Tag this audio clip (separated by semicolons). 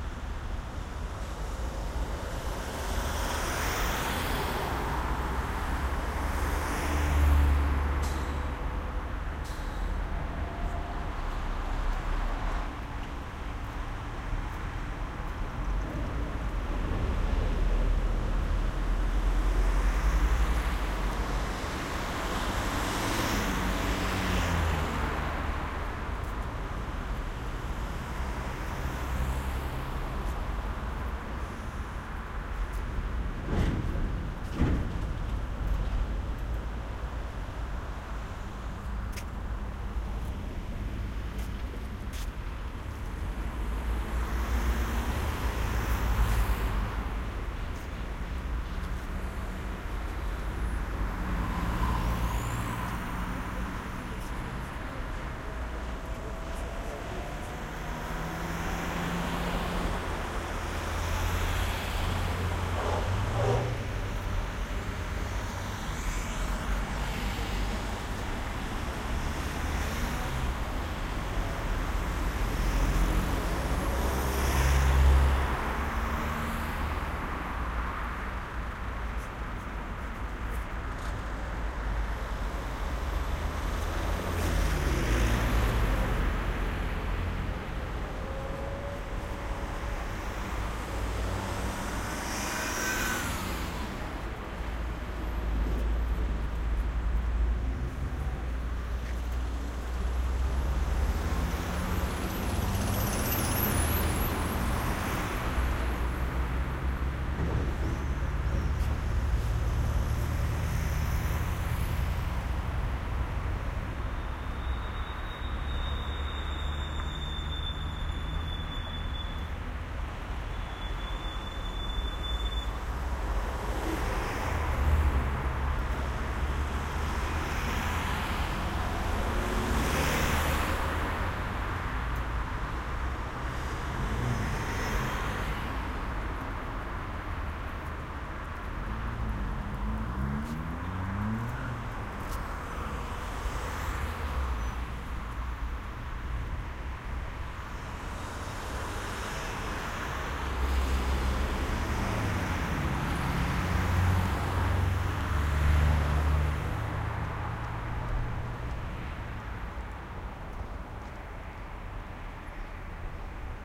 binaural; field-recording; street; szczecin; background-sound; ambience; passing-cars; diy; zoom; people; city; cars; h2